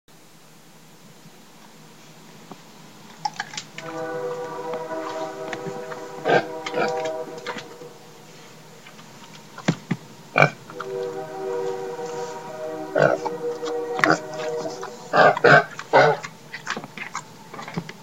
this is my pig pudgy. she is eating peanuts and oinking inside my house.
pig, animal, sound, oink